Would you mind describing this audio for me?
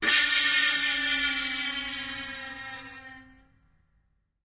away, locust clan
recordings of a grand piano, undergoing abuse with dry ice on the strings